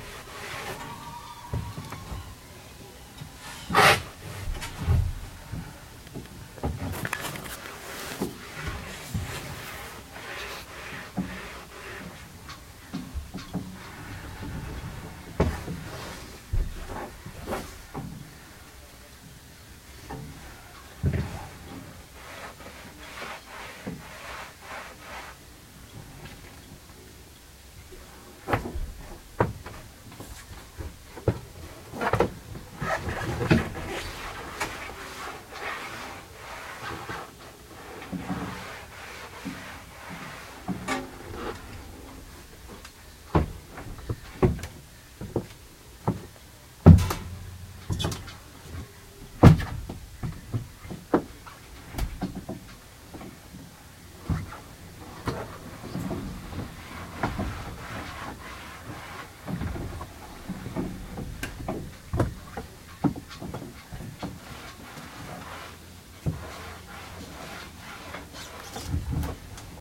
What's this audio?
Sailing Boat Maintenance
Recording inside the cabin of a Southerly sail boat with maintenance being carried out the roof.
boat, creaking, falls, foot, maintenance, polishing, sawing, whistling, wind